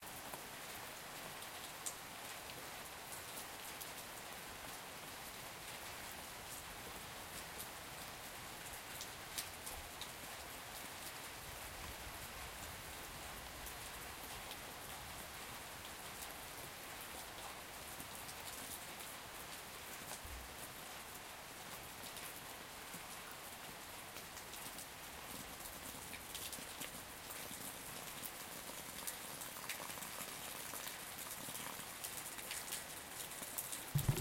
Rain - Moderate rain
rain, regn, storm, water, weather